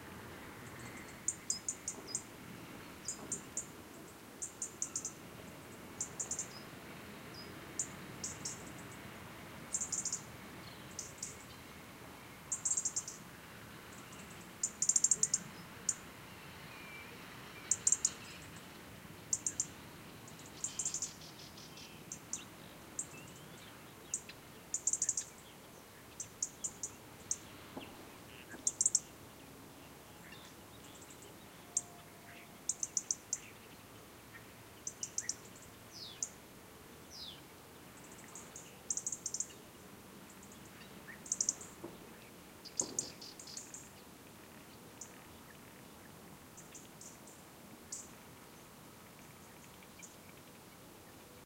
Robins often come near you during winter, so I could record this one reasonably well. Other birds (and distant shots) in background